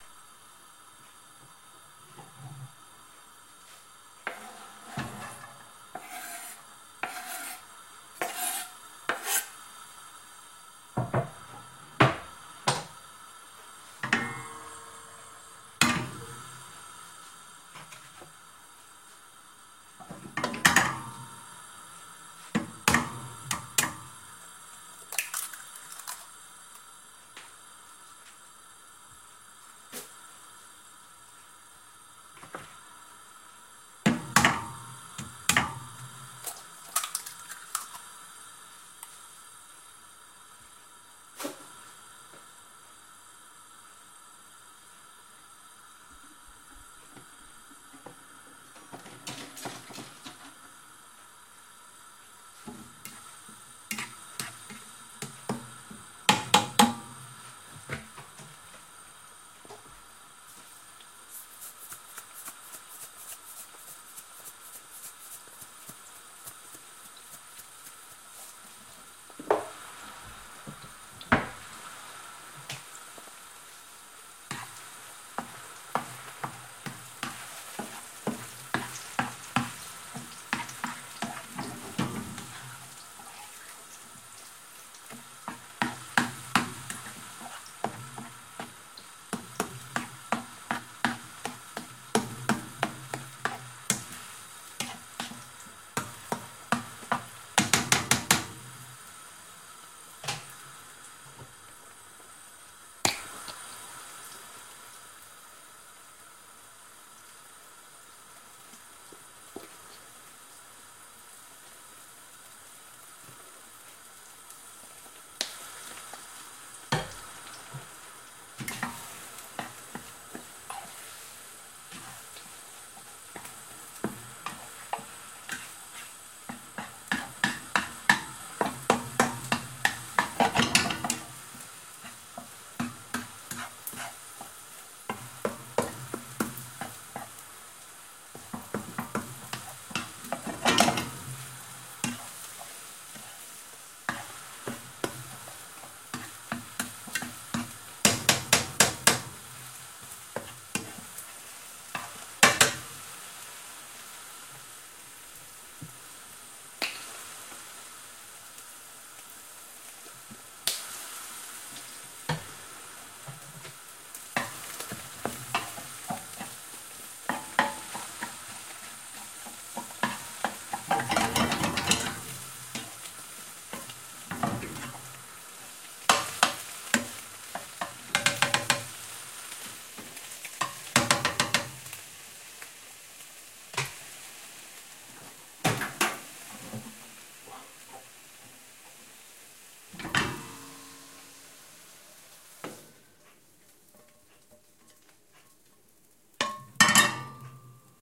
Recorded using the H4N Pro's internal microphone. Cooking scrambled eggs with a ticking clock in the background.
ambience, atmosphere, cooking, eggs, Foley